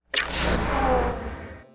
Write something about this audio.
A Space Fighter gets shot down.